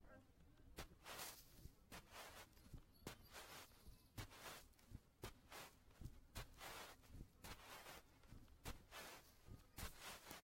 walking in beach sand
Walking on beach sand with variations of footsteps. Closed microphone technique used to capture sounds and focus on the steps and texture of sounds
walking, footsteps, steps, beach, OWI, sand